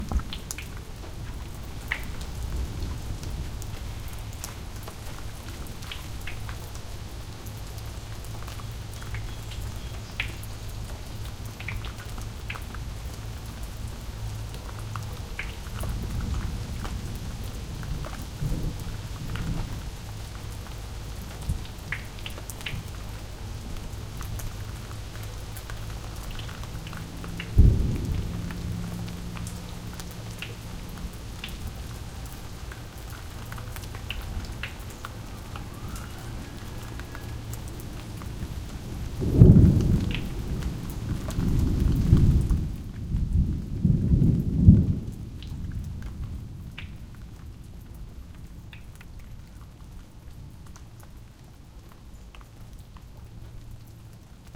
Gentle Rain with Thunder
recorded at Schuyler Lake near Minden, Ontario
recorded on a SONY PCM D50 in XY pattern
rain, thunder, gentle